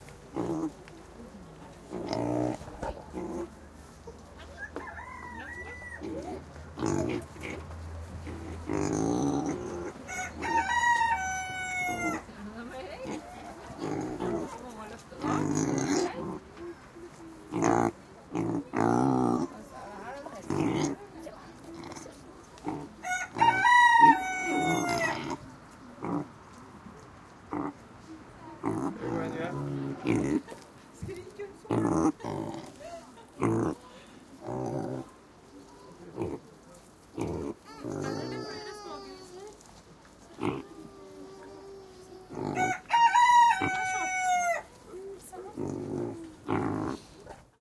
animals-pig-hens-Blaafarveverket-090721
Close on two pigs. Hens and people in background. Tascam DR-100.
cockerel, field-recording, hen, people, pig, rooster